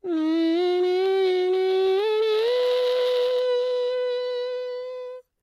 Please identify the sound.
electric guitar e minor1
Imitation of electric guitar solo part in e-minor. I almost close the mouth, sing some tones and blow little air for distortion effect.
dare-19, distorted, guitar, beatbox, sing, solo, voice